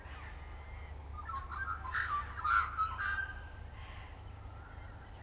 Bird Magpie
Recorded with Zoom H1
Edited with Audacity
Bird, Field-recording, Magpie